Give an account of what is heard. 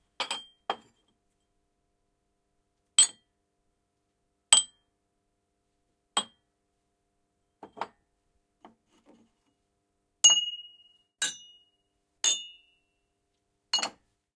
Recoreded with Zoom H6 XY Mic. Edited in Pro Tools.
Shot glass hits objects on a shelf.
dishes, hit